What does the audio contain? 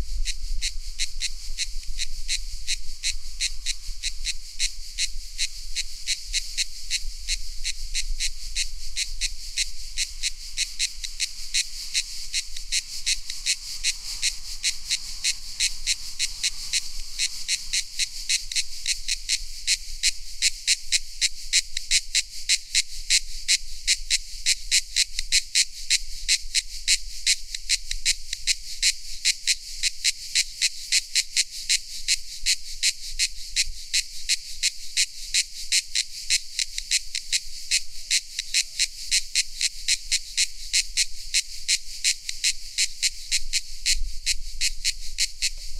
One loud lone cicada nearby, chorus of cicadas in the background. Recorded in 2011 in Italy using Zoom H4N with built-in mics.